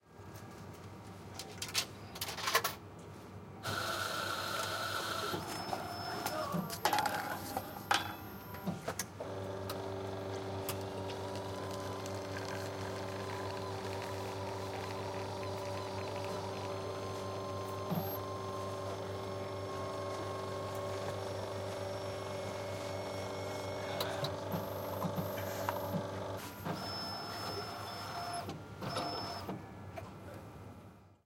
Paid Coffee machine 1
Getting a cup of coffe from a paid coffe machine.